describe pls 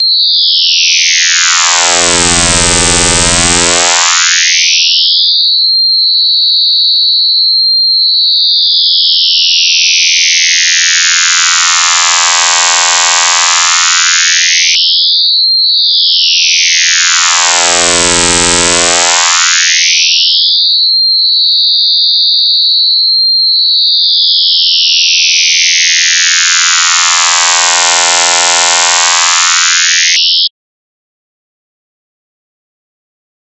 Long high pitch scream like noise with low frequency FM and high frequency AM.
high-pitch, scream